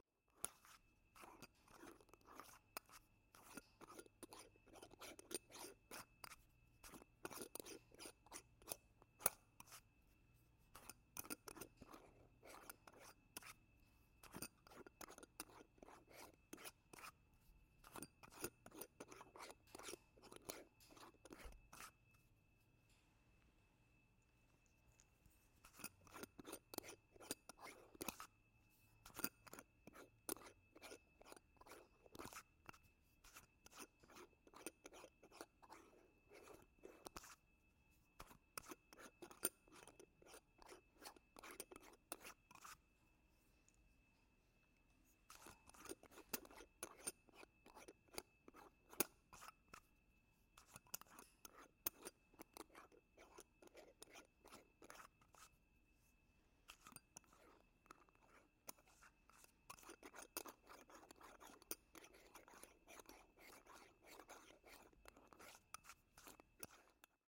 maryam sounds 6
This is another recording of me twisting the tin bottle cap off-and-on from a glass bottle of vitamins. This is done at a slightly quicker rate than the previous recording of the same sound.